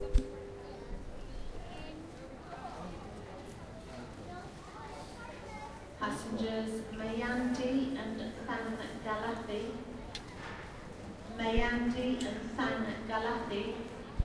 ZOOM0005 Heathrow announcement.
Heathrow airport announcement flight female voice